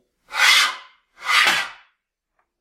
Metal Slide 8
Metal on Metal sliding movement
Metal, Metallic, Movement, Scrape, Scratch, Slide